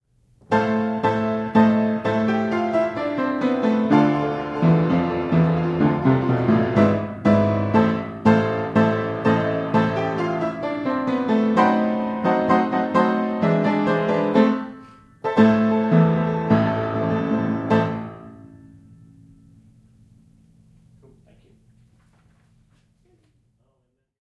Silent Movie - Sam Fox - Hurry Music (take3)
Music from "Sam Fox Moving Picture Music Volume 1" by J.S. Zamecnik (1913). Played on a Hamilton Vertical - Recorded with a Sony ECM-99 stereo microphone to SonyMD (MZ-N707)
1920s, film, movie, piano, silent-film